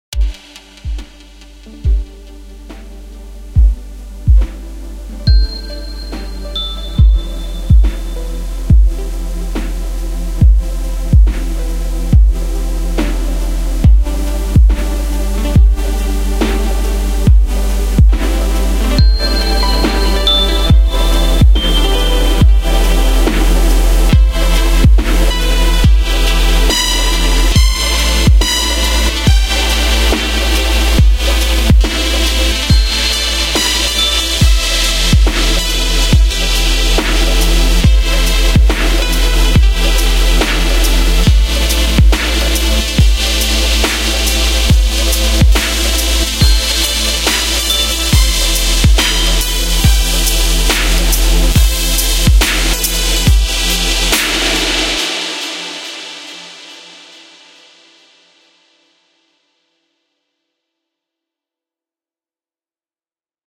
HV Loops (2014) - Parralecctal
!SO HERE THEY ARE!
There is no theme set for genre's, just 1 minute or so for each loop, for you to do what ya like with :)
Thanks for all the emails from people using my loops. It honestly makes me the happiest guy to know people are using my sound for some cool vids. N1! :D
x=X
bass, compression, electronic, eq, full-loop, fx, hats, kick, lead, limiter, loop, mastering, mix, pads, snare, synths